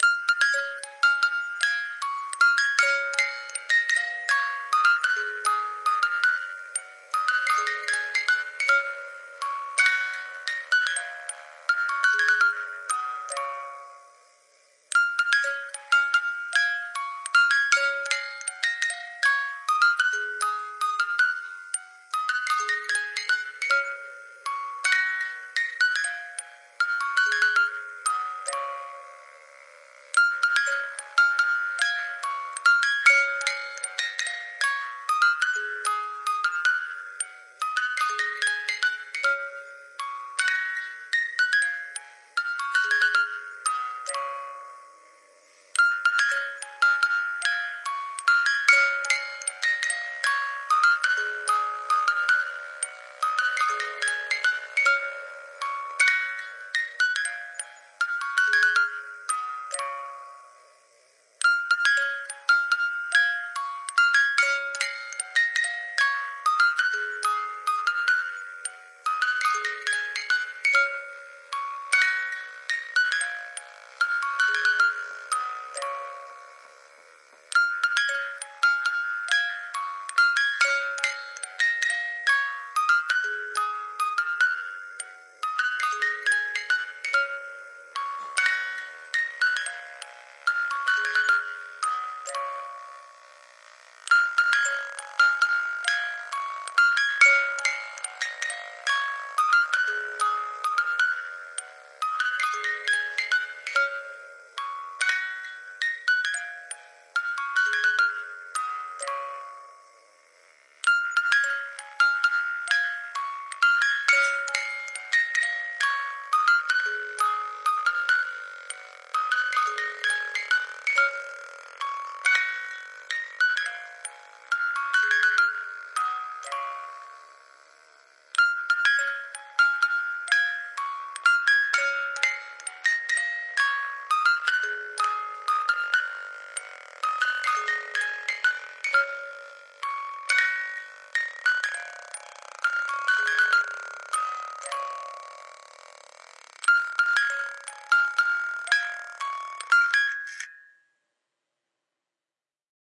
Baby wind up mobile music box 04-19-19
I came across my son's old wind up crib mobile and decided to put the mics on it. The song plays 9 times, then runs out of spring tension on the 10th pass.